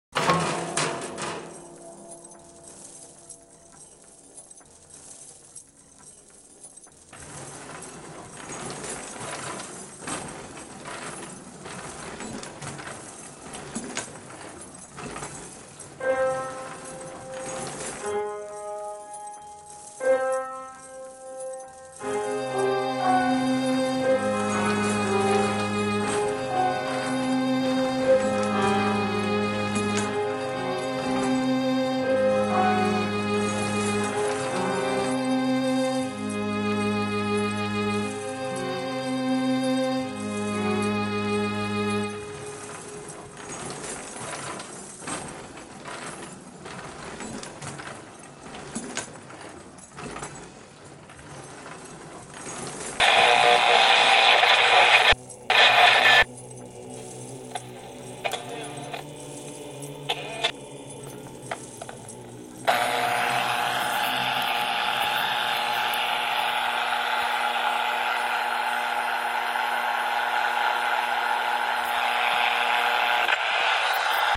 sound of ...
future, radio, sounds, space, star, SUN, wave